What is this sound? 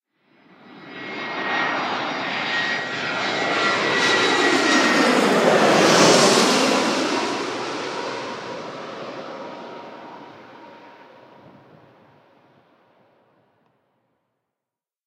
Recorded at Birmingham Airport on a very windy day.